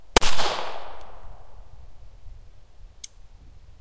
A TASCAM Dr-07 MkII stereo recording of the Bryco Arms Model 38, .380 ACP.
Recorded outside in a woodland environment. Here's a video if you like to see.